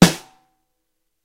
full 14" snare drum - double miked compressed and limited! Massive!!